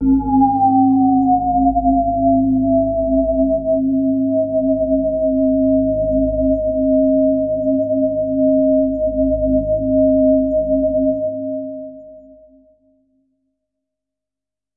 High Resonance Patch - G#1
This is a sample from my Q Rack hardware synth. It is part of the "Q multi 006: High Resonance Patch" sample pack. The sound is on the key in the name of the file. To create this samples both filters had high resonance settings, so both filters go into self oscillation.